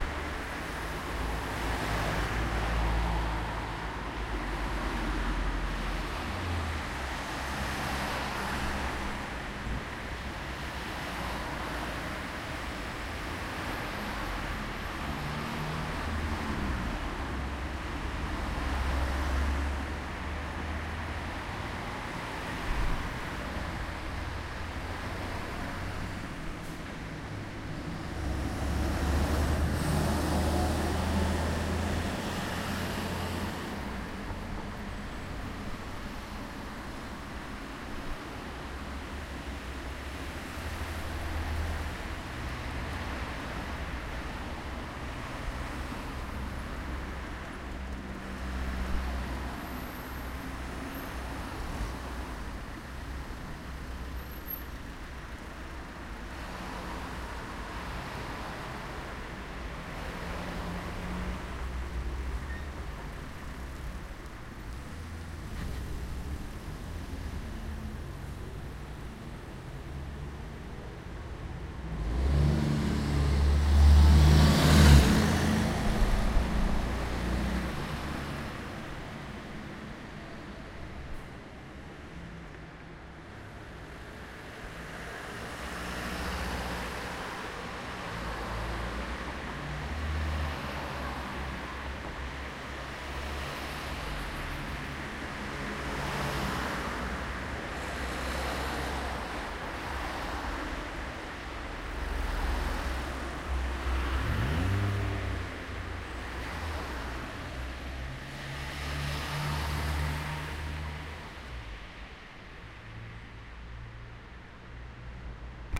city traffic day
City traffic during day.
field-recording, cars, city, street, traffic, day, bus